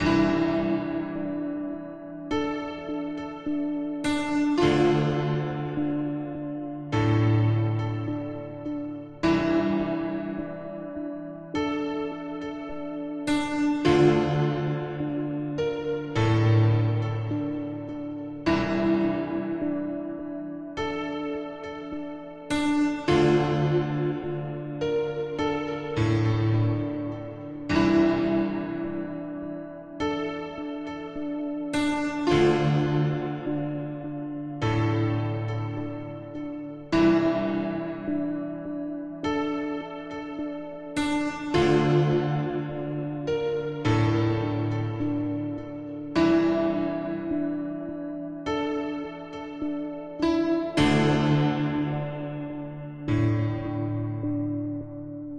Sadnes piano loop.
edited in Ableton live,Kontakt synth.